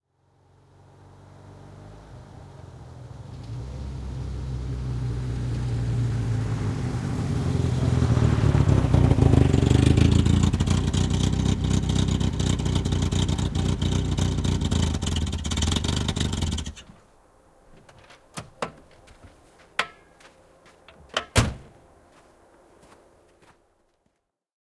Henkilöauto, tulo / A car with a broken silencer approaching, shutting down, car door, Ford Cortina, a 1973 model
Ford Cortina, vm 1973, jonka äänenvaimennin on rikki. Lähestyy, tulo pihaan, moottori sammuu, kuljettaja poistuu autosta, auton ovi. (Ford Cortina, 1600 cm3, 72 hv).
Paikka/Place: Suomi / Finland / Vihti / Haapakylä
Aika/Date: 15.09.1980
Auto, Autoilu, Autot, Cars, Field-Recording, Finland, Finnish-Broadcasting-Company, Motoring, Soundfx, Suomi, Tehosteet, Yle, Yleisradio